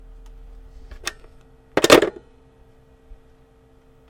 pick up and hang up